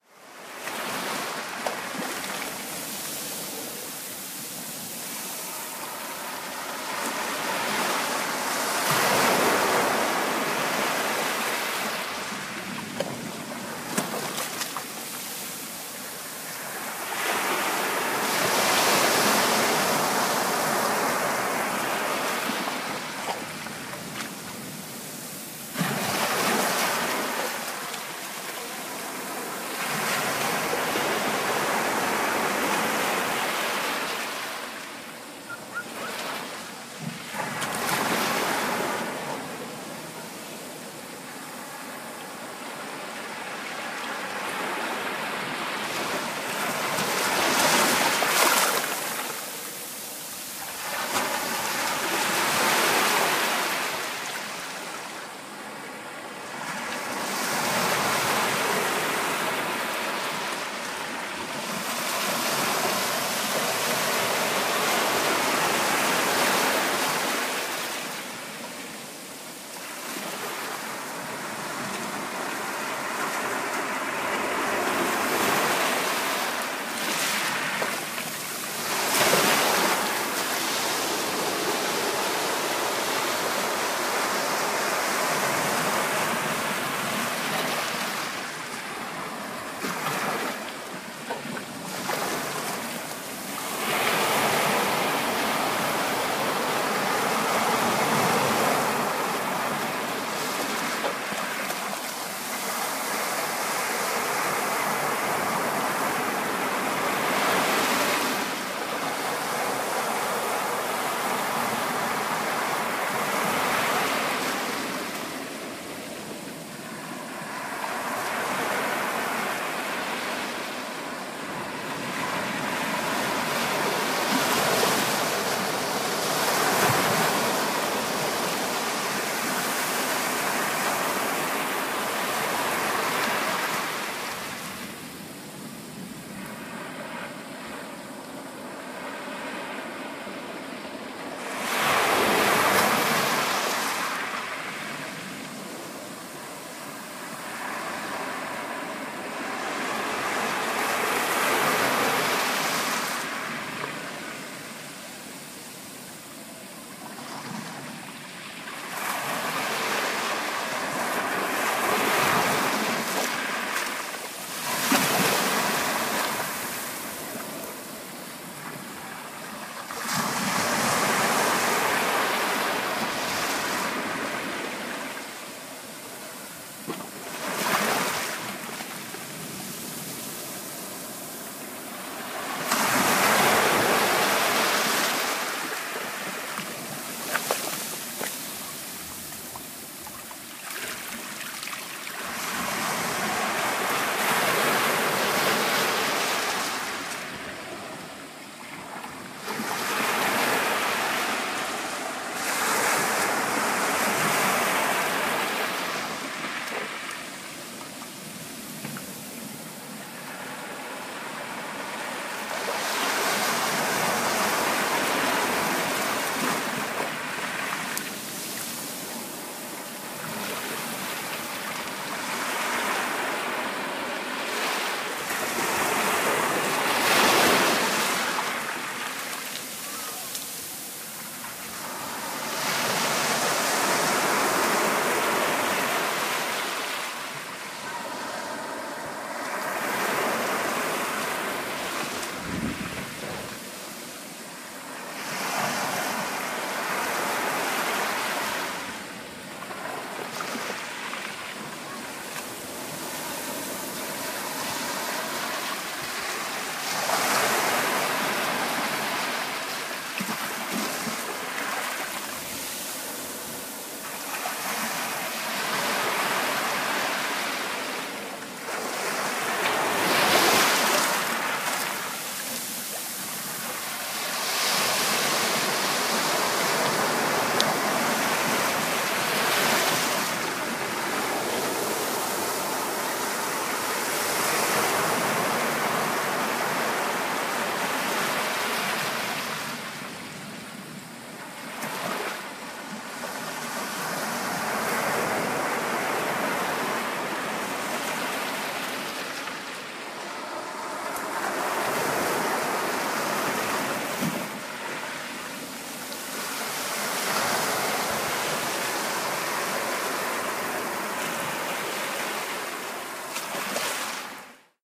Waves on Beach
Close recording of waves washing up on the beach. This sound was recorded beside a groyne, which some of the waves were hitting instead of reaching the shore. The sand in the area was wet from the tide washing up on it for a prolonged period. There was a few pebbles there too- though not as many as in my previous recording- they're also less audible here.
Weather got the better of me- as you might be able to hear, there's a lot of wind in the background, but it doesn't ruin it as such: I use my iPod's fabric case to protect the mic from the wind noise- it actually works! At least it wasn't as windy as at the clifftop- 28th May was VERY windy- there was high winds on the Humber Bridge too (which I crossed THREE times after taking a wrong turn) - though I made it across unharmed, with some spectacular scenery. All in all I'm rather pleased with this recording- it's a favourite of mine.
tide, field-recording, ocean, sand, nature, waves, stones, coastal, splash, water, surf, rocks, pebbles, ambiance, ambience, wave, stone, seaside, sea, seashore, beach, coast, lapping, crashing, shore, relaxing